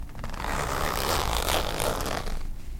This is a coat zipper, unzipping all the way.